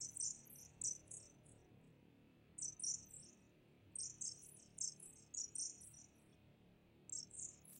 This is edited from bird chirp. It sounds like crickets in the summer!